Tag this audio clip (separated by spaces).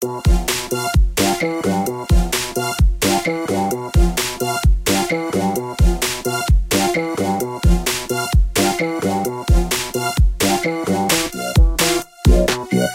free-music-download electronic-music audio-library free-music-to-use music-for-vlog free-music sbt download-music music-loops download-background-music free-vlogging-music loops vlogging-music download-free-music vlog-music music prism syntheticbiocybertechnology music-for-videos vlog background-music vlogger-music